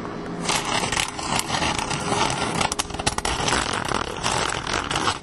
Wall-Mounted Pencil Sharpener
The chaotic tones of a
large pencil being sharpened
on a wall-mounted unit.
A schoolboy's favorite
safe destruction.